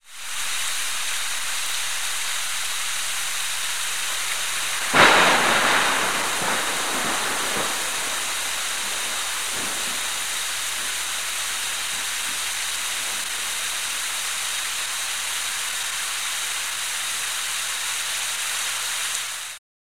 rain + thunder
Rain and thunder recorded from an open window
I Recorded it with an optimus tape deck and an old microphone (The tape I recorded it on was a maxell UR), I then used audacity and the same tape deck to convert it to digital.
If you use it please tell me what you did with it, I would love to know.
weather, thunderstorm, rain, nature, thunder, thunder-storm, lightning, storm